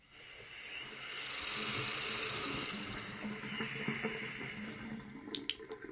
Water tap with rattle and hiss